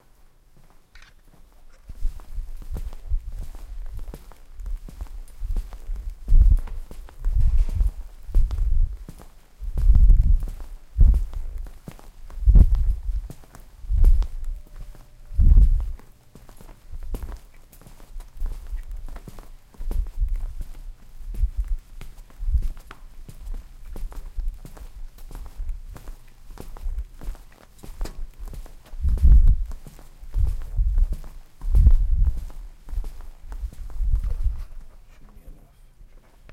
Two people walking in a hallway (no heels). Recorded with Olympus LS-100 hanging on our side near the floor. Might have some contact sound but overall not major interference.
footstep, walk, footsteps, walking, hallway